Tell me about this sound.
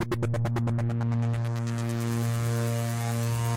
135 Grobler Synth 06
hard club synth